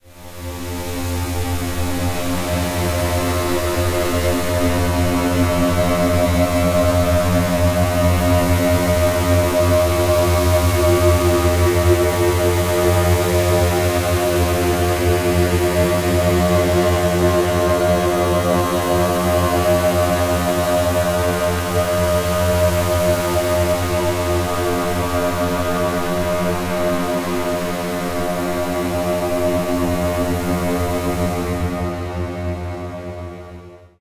Image Sonification 003
Imagen de 500x300px realizada en Adobe Photoshop CS2, exportada a formato RAW y posteriormente abierta en Audacity donde se han aplicado varios efectos.
audification, effect, experimental, image, reverb, sonification